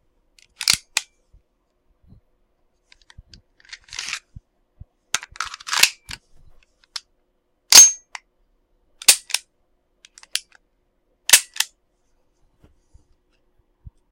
Mono recording... reloading an 8mm Valtro mod AP92 Army replica pistol. In order the sounds are: Pulling back the slide, pulling out the mag, placing the mag into the pistol, releasing the slide, pulling the trigger, pulling back the hammer, second trigger pull.
gun, magazine, pistol, reload, trigger, weapon